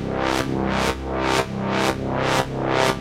warpy loop loop
warpy, electric, phase, warp, magnet, buzz